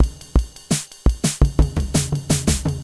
More drum loops made freeware drum machine with temp indicated in tags and file name if known. Some are edited to loop perfectly.
loop, bpm, 85, drum